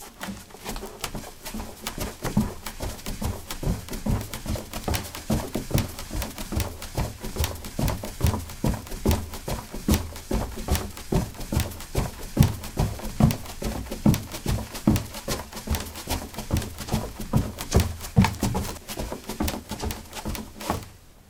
wood 02c socks run
Running on a wooden floor: socks. Recorded with a ZOOM H2 in a basement of a house: a large wooden table placed on a carpet over concrete. Normalized with Audacity.
footstep, footsteps, run, running, step, steps